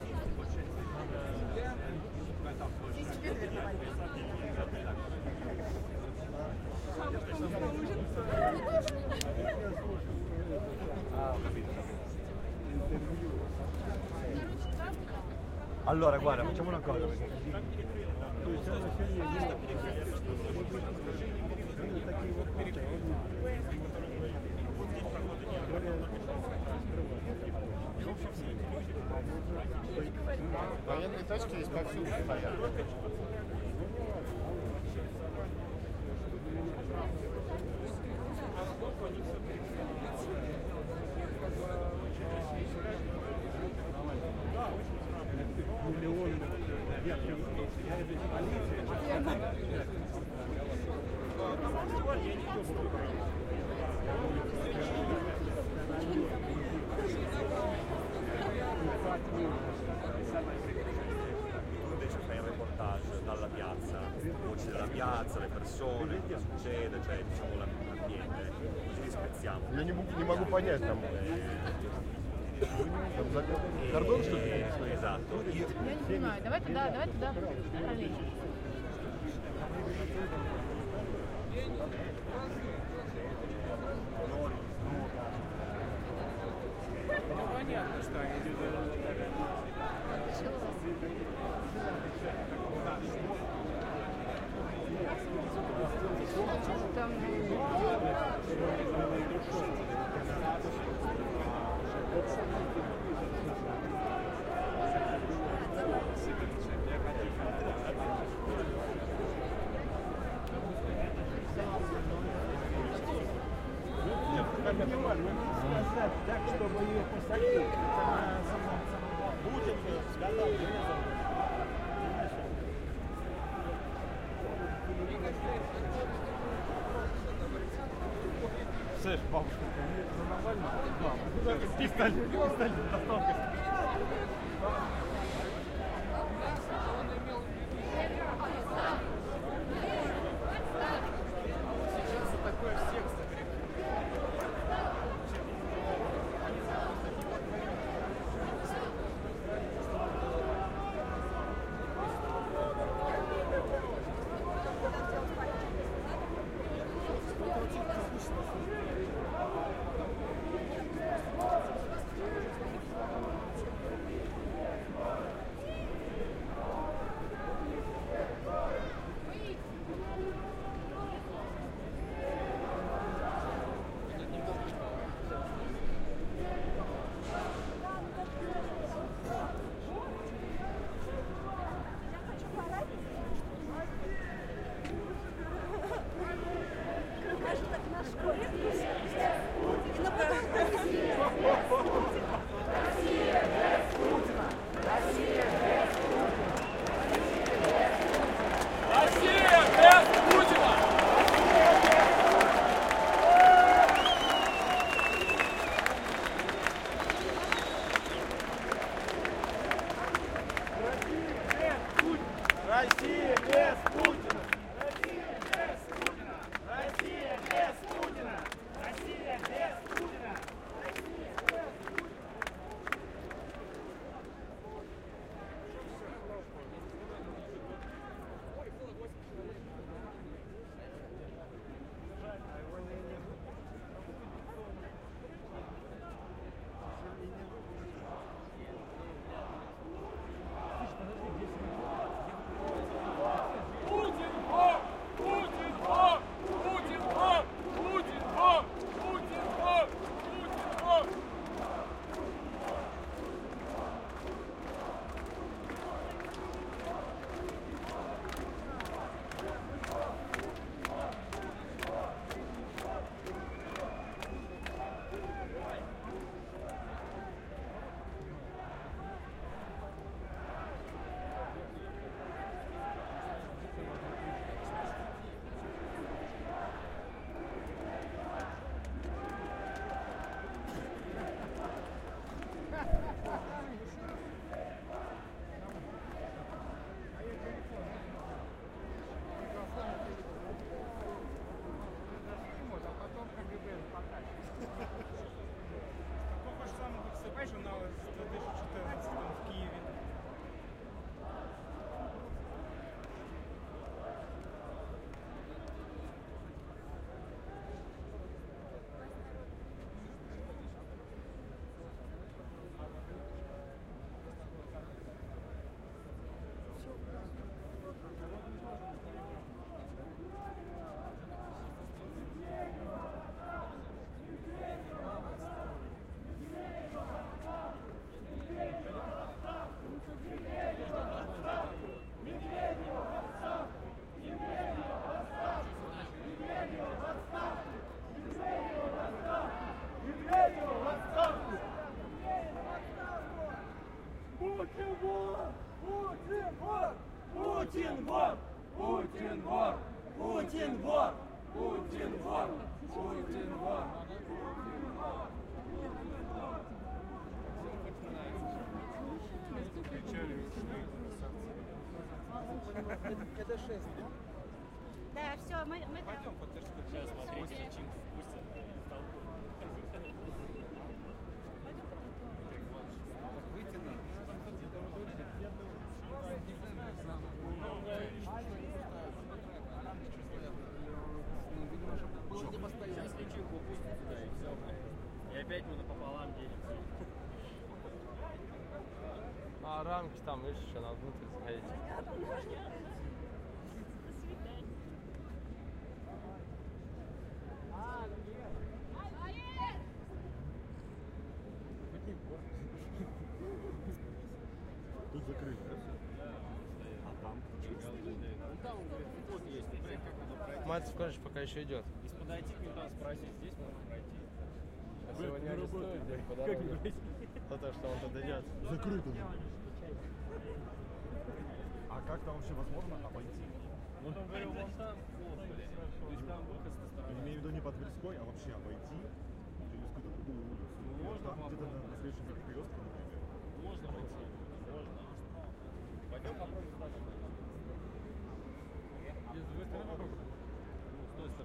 Crowd on Moscow anti-corruption protest 12 june 2017
Anti-corruption protest meeting (2017.06.12) in Moscow.
People are talking and shouting "Russia without Putin!", "Shame!" etc.
Recorded with:ZOOM H6 (XY-mic)